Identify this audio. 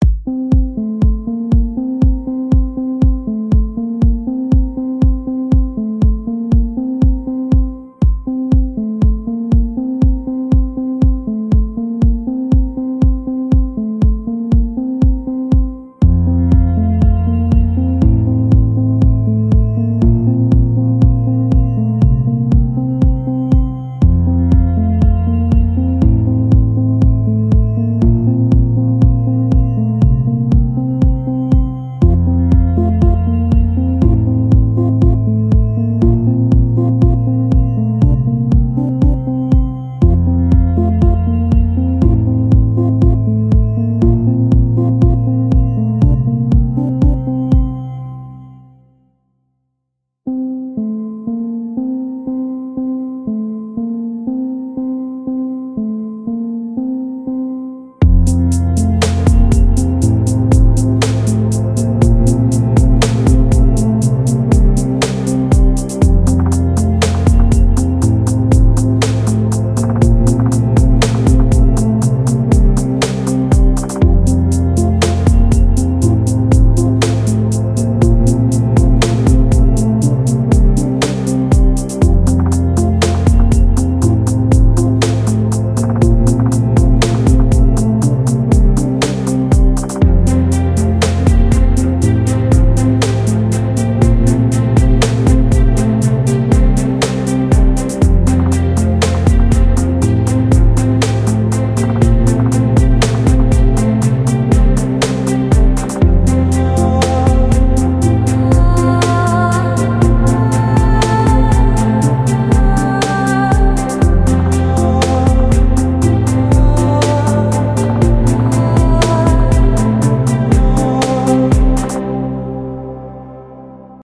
A blend of trap drums and ambient music at a 120bpm.
Staccato strings and female vocals join later